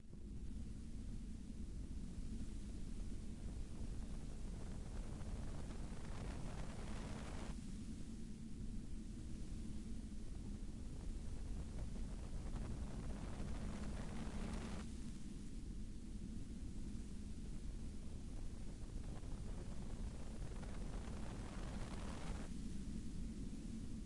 noise soft with cracks
Part of a collection of various types and forms of audionoise (to be expanded)
dub, glitch, noise, noise-dub, silly, soft, sweet